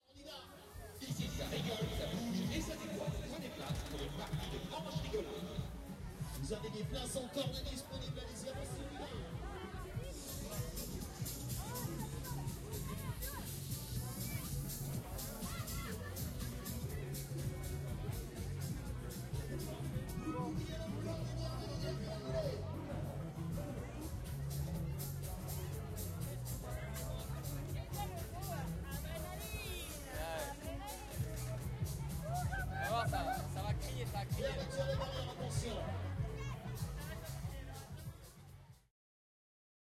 AMB Fête forraine 02 MS
Ambiance d'une Fête foraine en France